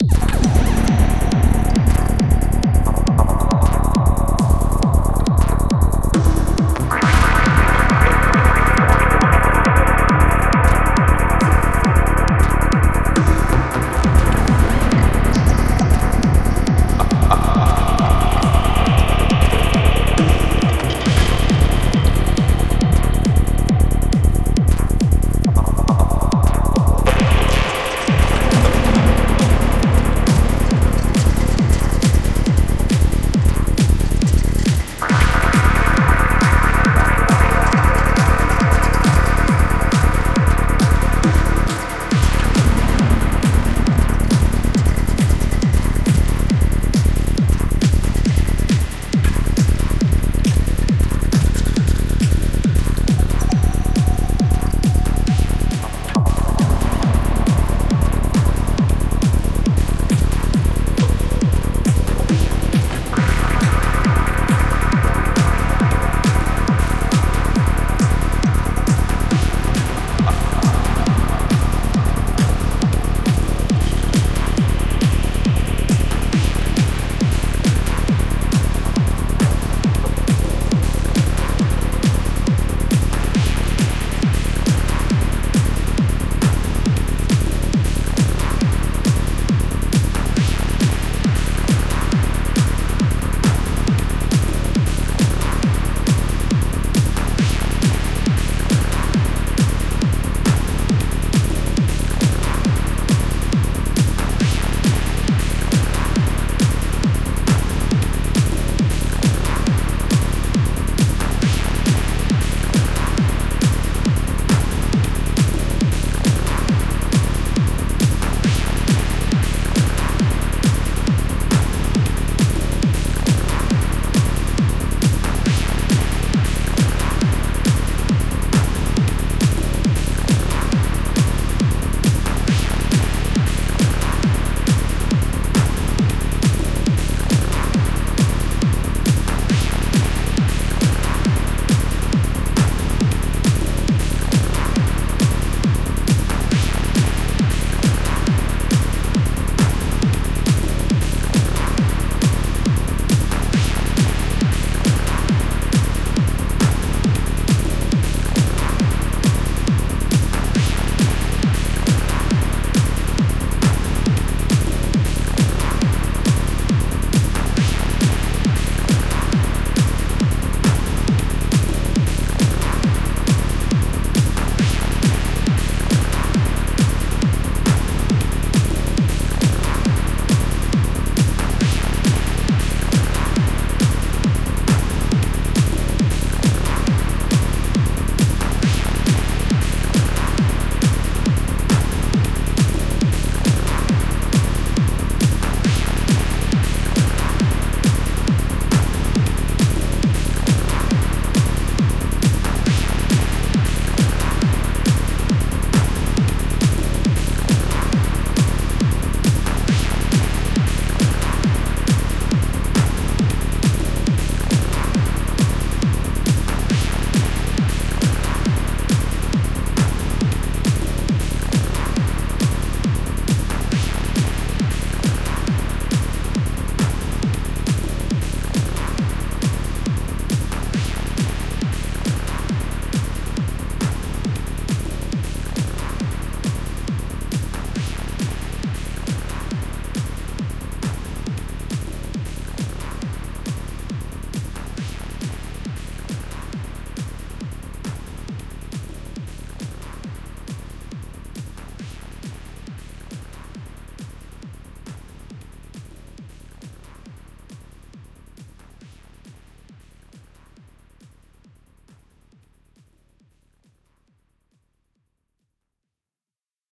PSYTRANCE BY kris demo mix final
club, dance, electronic, mix-demo, psy-trance, trance